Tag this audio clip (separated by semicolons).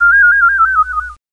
descending; human; trill; whistle; yodel